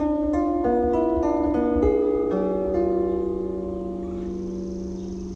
outdoor piano harp 3
A junked upright piano sits in my yard, 15 feet away from the street curb. (Of course, I deliberately put it there:) It's been sitting there for about 3 years, occasionally shedding pieces of wood after seasonal changes, rain, etc. I occasionally record it, checking its detuning process. Sometimes, I'll play it with sticks, throw pine cones at it, stuff leaves and twigs between the strings. The samples here are just a small tidbit of what's played...perhaps I'll create an album entirely out of these recordings. Pardon the ambient sounds, but if you like it, perhaps I can synchronize my playing with (my backyard) eagle screeches when they choose to groove with me.
Piano-strings, Detuned-piano, Rusty-wires, Rusty-strings, la-Stephen-Scott, Detuned, beautiful-decay, Weathered-piano